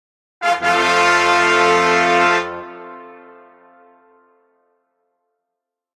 TaDa! sting composed of trumpets, trombones, french horns, trumpet section in Garageband.